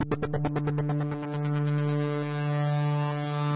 135 Grobler Synth 04
hard club synth
club, fast, free, hard, loop, sound, synth, trance